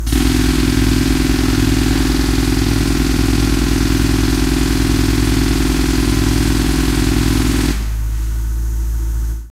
Jack Hammer breaking up concrete (short burst)
A Jackhammer burst breaking concrete...